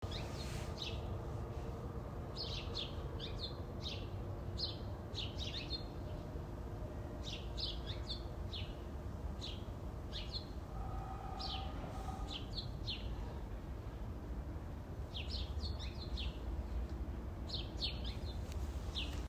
Short clip on my iPhone of chickadees in a tree on my street in Vancouver. Skytrain is way off in the distance briefly